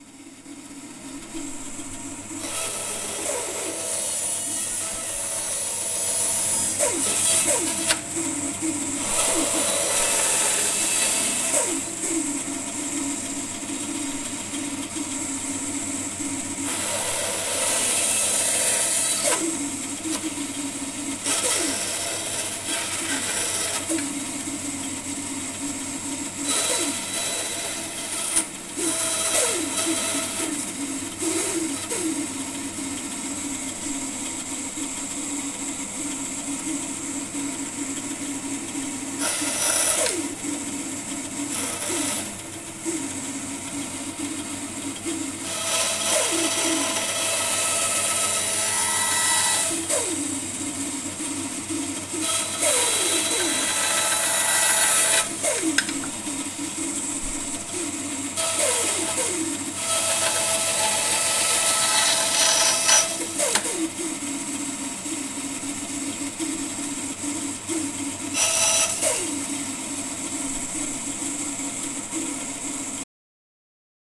Pack of power tools recorded in carpenter's workshop in Savijärvi, Tavastia Proper. Zoom H4n.